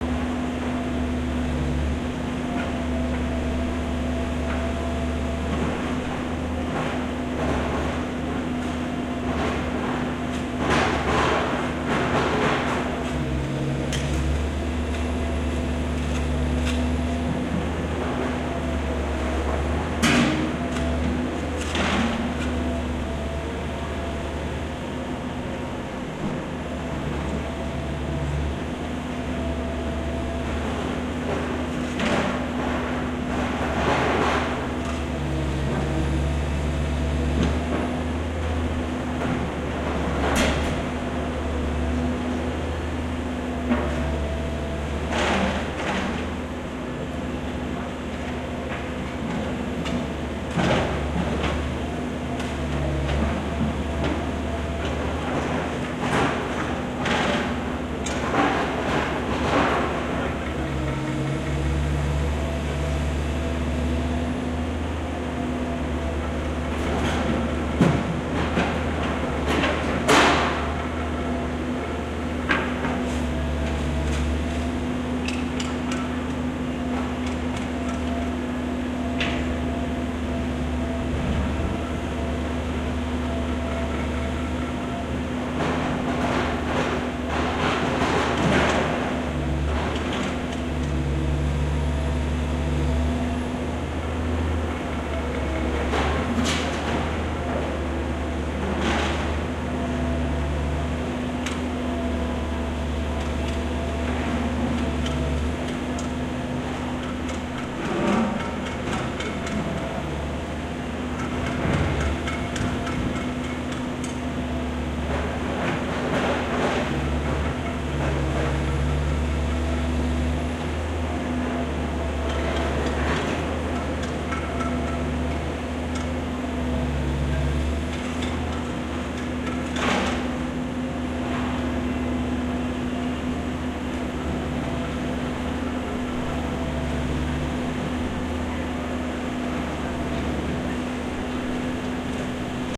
I passed by this road construction site. A bulldozer maneuvering and lots of metal, concrete and other stuff gets thrown around while men are putting new curb stones in place. Put on your hard hat. Recorded with a BP 4025 microphone on a Nagra PII+ recorder.
bulldozer, city, construction, field-recording, industrial, machine, machinery, men-working, noise, road